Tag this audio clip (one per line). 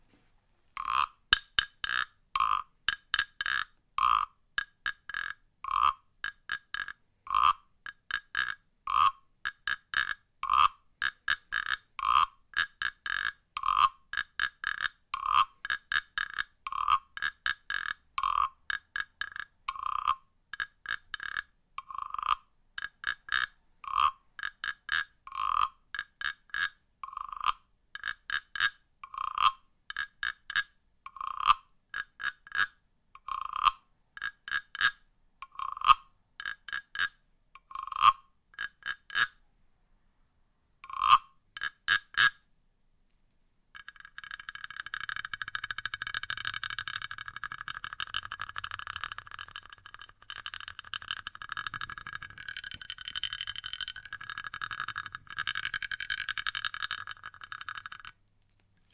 handheld
Guiros
percussional